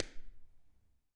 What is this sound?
Pack of 17 handclaps. In full stereo.
handclap, clap